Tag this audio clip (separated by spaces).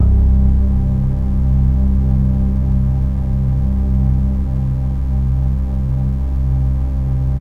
Pad Layered Strings